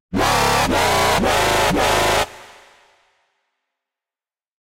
DnB & Dubstep Samples